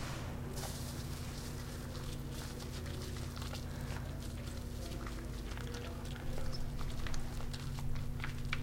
Bathroom Handwash02
flush, toilet, wc